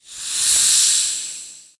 His sound of a bottle being opened
Hiss, air, door, compressed